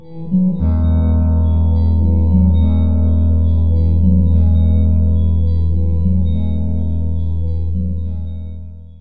Echo Lab Loops v2
electric, echo, guitar, samples, delay